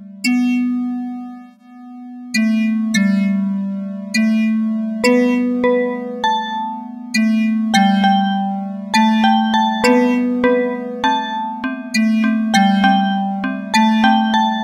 Rainy Day (Loop)
Why are the clouds crying? We shall never know.
A short 8-bit music loop made in Bosca Ceoil.
music, free, rainy-day, cool, outside, loop, bit, best, bosca, rainy, rain, nice, a, amazing, great, pixel, domain, day, royalty, ceoil, good, loopable, 8, public